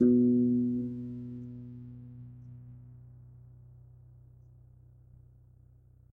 my mini guitar aria pepe
nylon, string